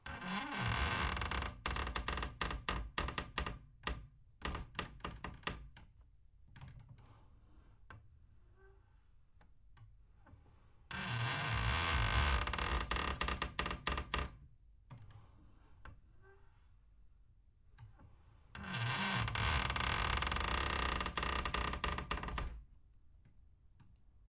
Recorded with a Zoom H6 (used the XYH-6 microphone).
Creeking comes from my Bed.